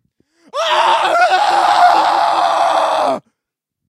male Thijs loud scream
Thijs screams very loud, torture sounds.
Male_Thijs_loud_scream
History of the sample
studied biology in Utrecht, The Netherlands, and in his free time participated in projects where sound-fx or film-music were needed.
The sample was recorded late 2001 in his student-apartment for some special effects for an interactive theatre play in which Than helped with sound-fx and foley for short animations...
This time a lot of screams were needed as special effect sounds for some fake 'torturing' device within the play.
Thijs, a friend of Than's, showed the person who was doing some voice over screaming sessions, 'how to do it'.
So... that's why there's currently only 'one' Thijs-sample in my collection...
Apparently it turned out to be sort of an unique and 'lucky' shot!
agony, schrei, vocal, helmut, shouting, horror, psycho, children-of-men, psychopathic, voice, screams, screaming, exaggerated, shout, schreeuw, brul, wilhelm, psychotic, wilhelm-scream, male, yell, human, weird, scream, man, the-scream, pain, cry, der-schrei, yelling